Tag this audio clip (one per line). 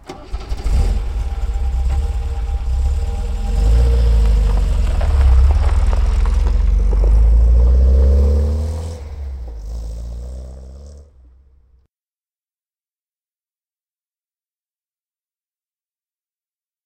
car
drive
engine
gravel
mg
otg